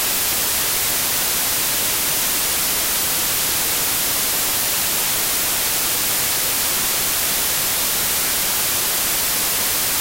Withe Hiss created with Audacity
sough, woosh